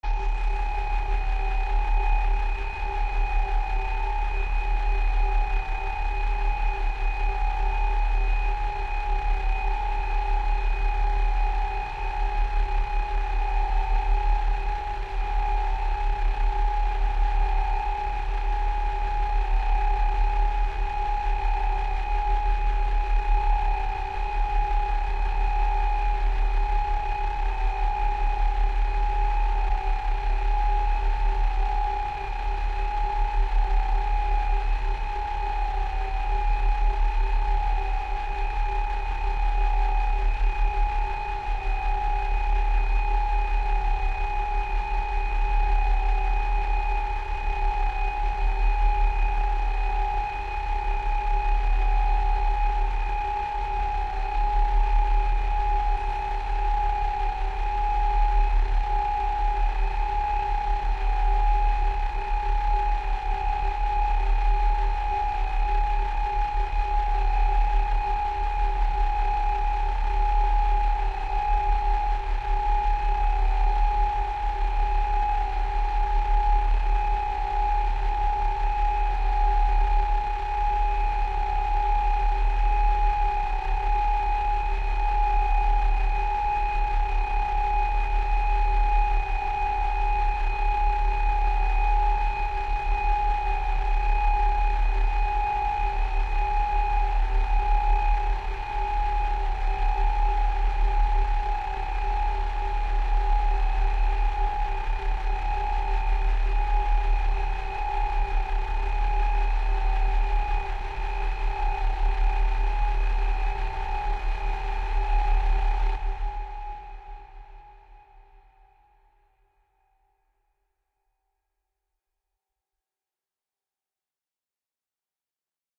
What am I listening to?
creepy alarm
sounds like something you would hear in a ship while it was sinking